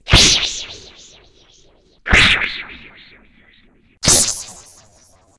Sifi Gun
This was made as more of a sifi air rifle rather than a "laser" pistol. However it can still work both ways. The track has three sound effects. They all have a "similar" sound but some very in pitch or tempo.
air, gun, laser, launcher, pistol, rifle, rocket, sifi